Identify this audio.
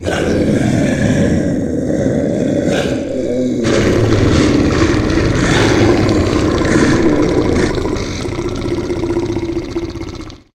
Monster Sound Effects 5
alien, animal, animation, beast, dinosaur, dragon, fantasy, fearful, growl, grunt, horror, monster, predator, roaring, scary